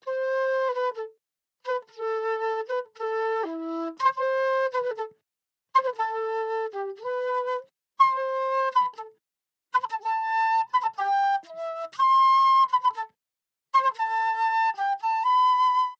Western Concert Flute 07 - 150bpm - G - New Nation
trap, smooth, music, wind, loop, fun, eastern, recording, woodwind, hip-hip, wood-wind, instrument, rnb, flute, rap, live